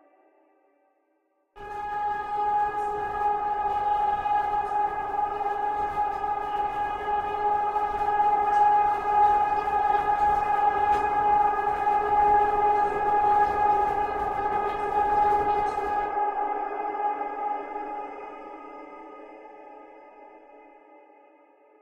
LAYERS 002 - Granular Hastings - G#4
LAYERS 002 - Granular Hastings is an extensive multisample package containing 73 samples covering C0 till C6. The key name is included in the sample name. The sound of Granular Hastings is all in the name: an alien outer space soundscape mixed with granular hastings. It was created using Kontakt 3 within Cubase and a lot of convolution.